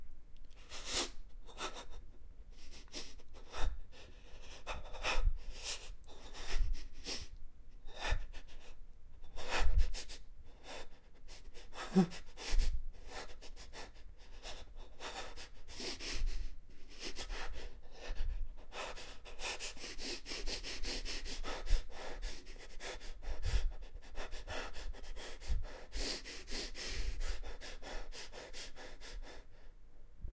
Breathing, panicked
A recording of panicked breathing. Perfect for scary stuff.
breath, Breathing, horror, human, hyperventilation, panic, panicked, scary